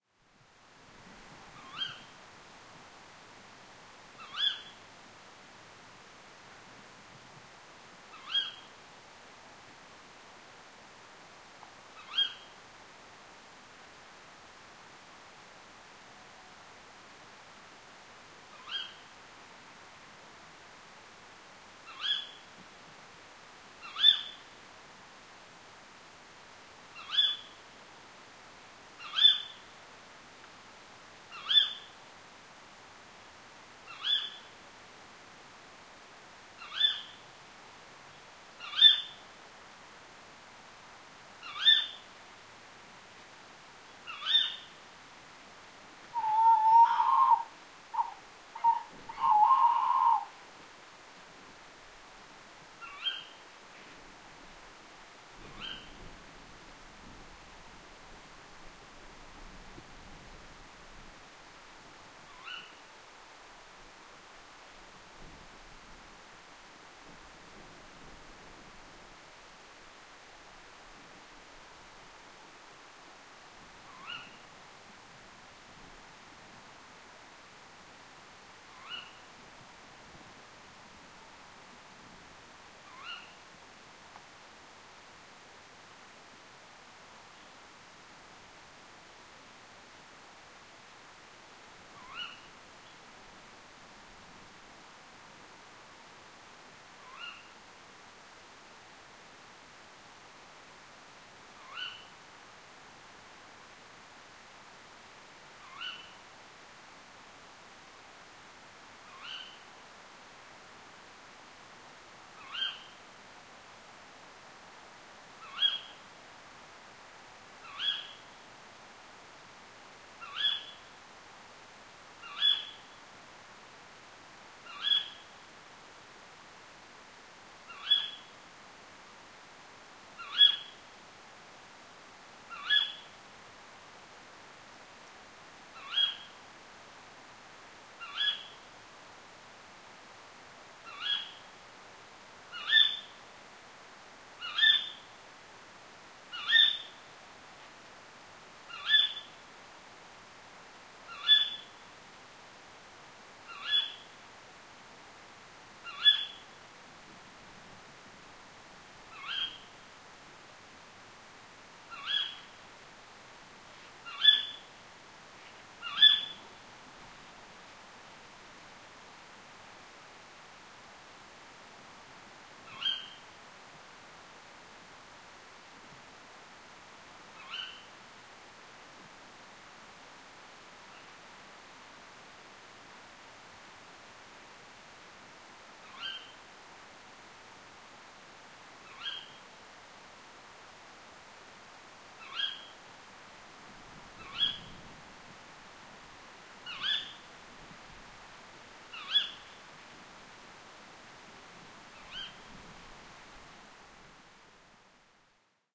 Tawny Owls 4
A stereo field-recording of female Tawny Owls (Strix aluco) screeching, a male joins in briefly at 46s. Recorded on a breezy night, the male was to the right of the mics when I set up the equipment . Rode NT-4+Dead Kitten > FEL battery pre-amp > Zoom H2 line in.
bird, birds, field-recording, hoot, hooting, owl, owls, screech, stereo, strix-aluco, tawny-owl, xy